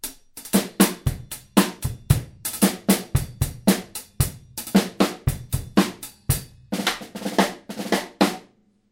supra beat straight double snare
A drum beat from the 60s with double beat snare, hihat and pop kick. Ludwig Supraphonic used.